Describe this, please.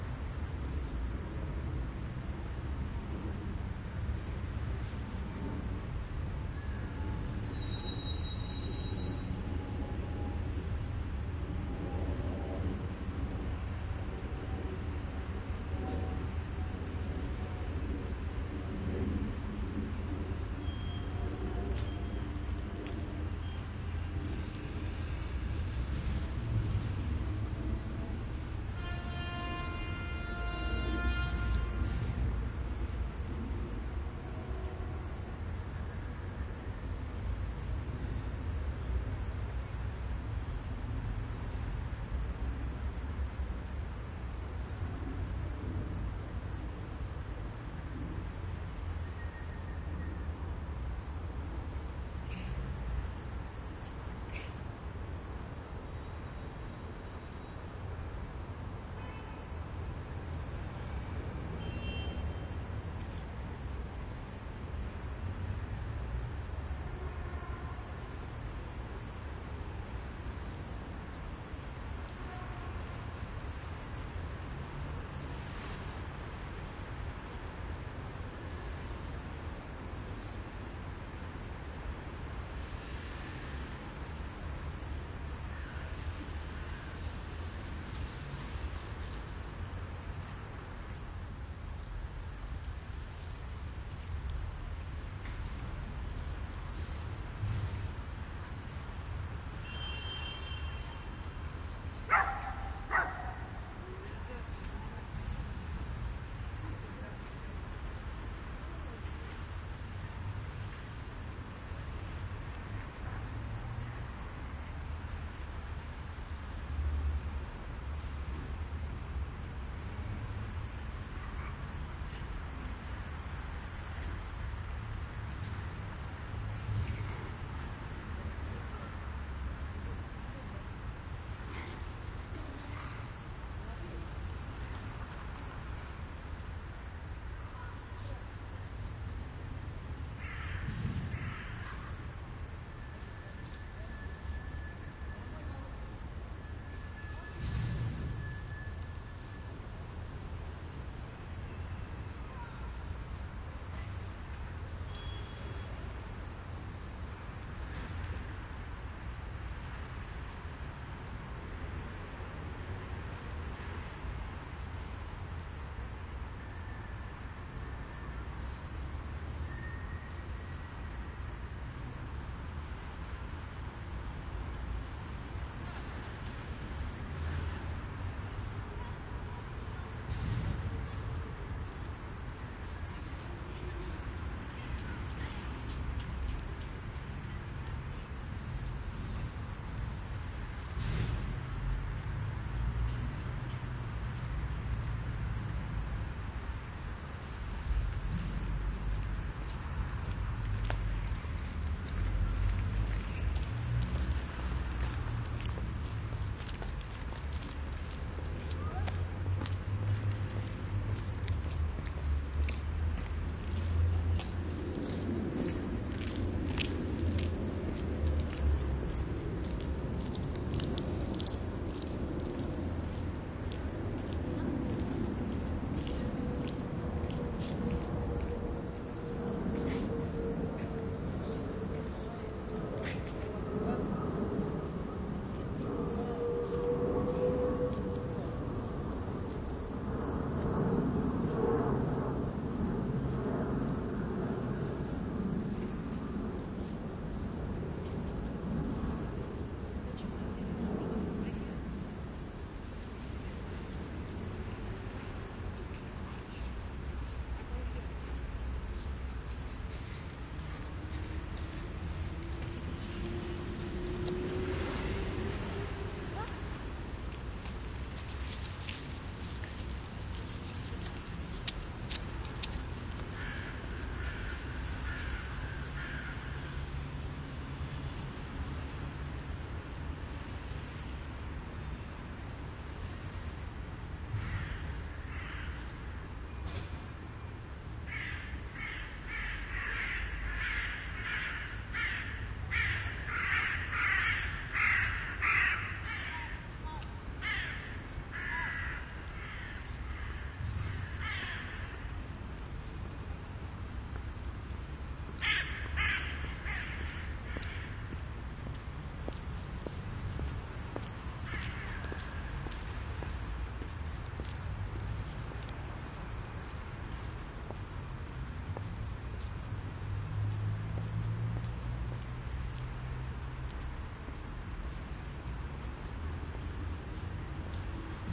moscow neighborhood with some voices, airplanes and medium traffic
Suburbian neighborhood in Russia with medium traffic, some people, airplanes and dogs
traffic, russia, residential, cars, field-recording, dog, suburbs, binaural, neighborhood, plane